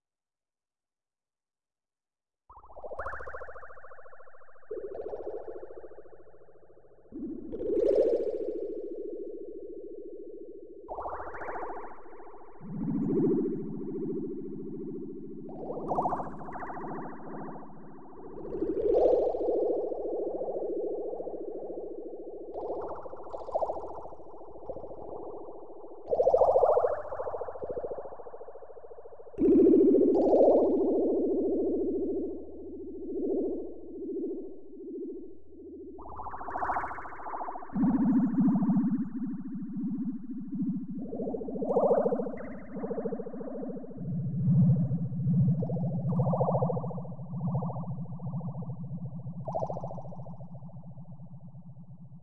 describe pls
A weird ambience of creatures in an alien ecosystem.